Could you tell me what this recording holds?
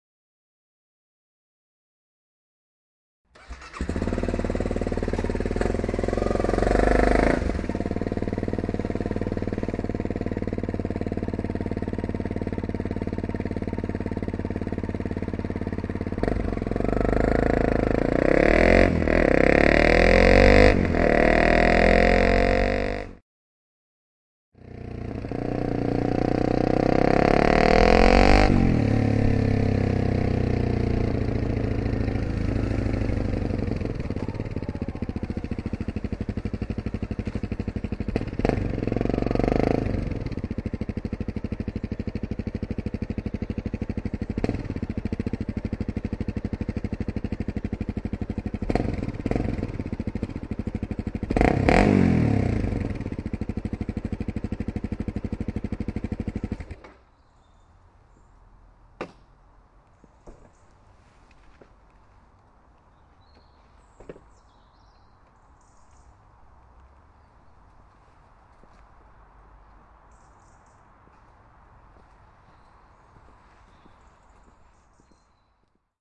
Single Cylinder Motorcycle
Start up and ride off aboard a little Honda CRF250 with Leo Vince exhaust. This was recorded on a Zoom H1 with home made external mics strapped to the outside of a backpack.
on-board, motorbike, cylinder, exhaust, honda, motorcycle, crf250, engine, single, riding